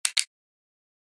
OFFICE SOUND FX - home recording